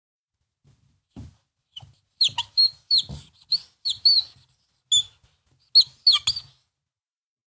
Sonido: 25
Etiquetas: Pizarra Audio UNAD
Descripción: Captura sonido de pizarra
Canales: 1
Bit D.: 16 Bits
Duración: 00:00:07
Audio, Pizarra, UNAD